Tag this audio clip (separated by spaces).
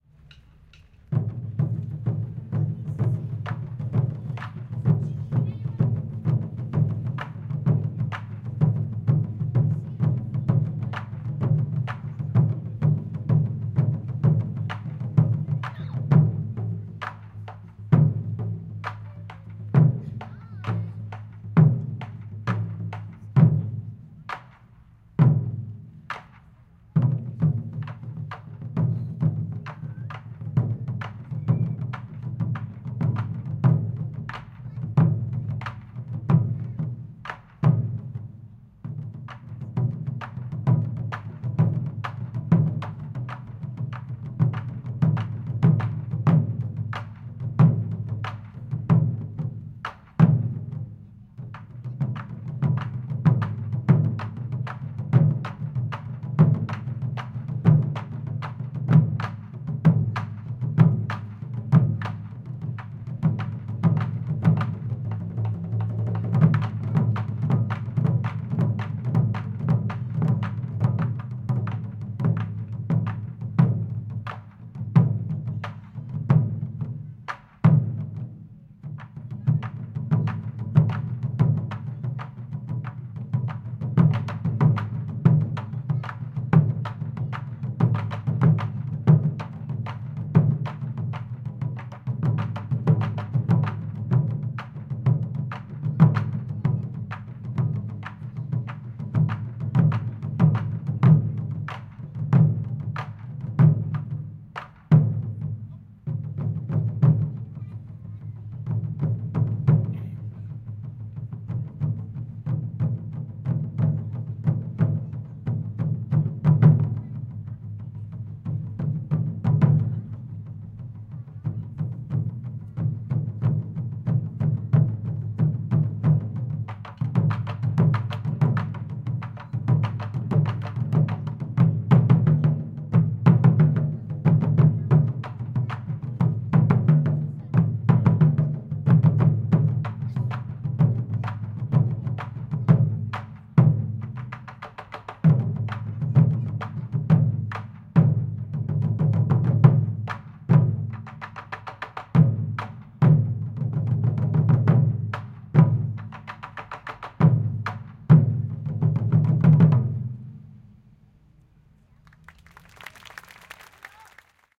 Japan traditional field-recording Taiko France atmosphere drummers drums ambience music-festival Japanese Paris street fete-de-la-musique soundscape